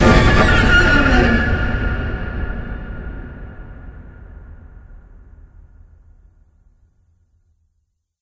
This is one of the jumpscare sounds heard in my game "Otherworld Hospital" when a new demon appears. Cello was recorded on a Tascam DR-40, then layered with an impact sound in Audacity, where bass equalization and a reverb effect were applied.